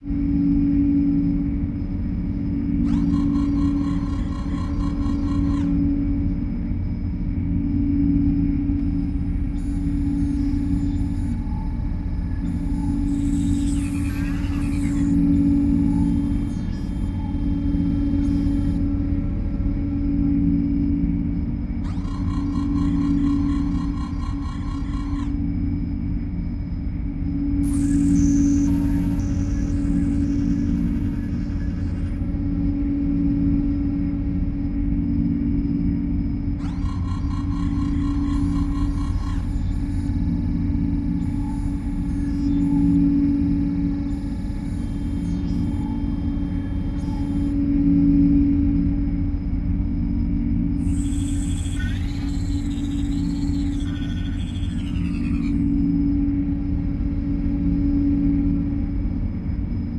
This is a compilation of spaceship cockpit noises I created for a sci-fi themed game project. Since some of the sounds weren't used in the game, I've uploaded these for everyone to use for free.
All the sounds were created with Native Instruments' Massive synthesizer and several field recordings.
You can find and download other sounds from the project in the pack.